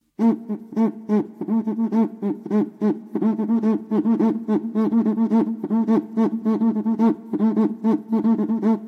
zambomba spanish instrument
instrument, spanish, zambomba